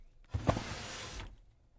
Drawer closing
A drawer being closed on an antique desk.